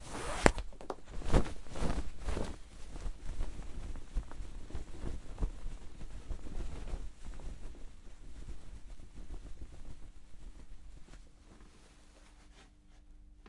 Parachute Opening
Now this SFX is my pride and joy. I acted quickly to get materials to work together to make this sound. I pulled a rope through the belt-eyes of some short pants, which would be the ropes releasing from the bag. Then I fluttered the pants to sound asif wind and the parachute material was colliding, making the sound so much more convincing
air, glide, open, OWI, pants, parachute, ropes, sfx, sky, wind